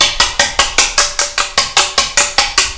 Ceiling Fan Wire Hanger 2
An extended wire hanger rapping against a ceiling fan.
Ceiling-Fan, Ceiling-Fan-Wire-Hanger, Wire-Hanger